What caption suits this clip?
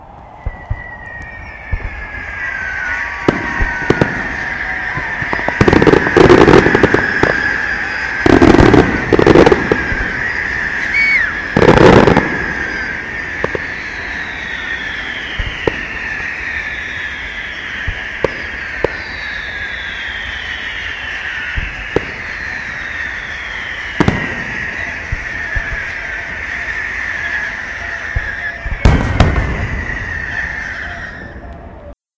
hits and whistling sequence
recording of a firework explosion with some distant crowd whistling
ambience, cheer, crowd, distant, ecstatic, explosion, fire, fireworks, hit, loud, outside, people, sequence, whistle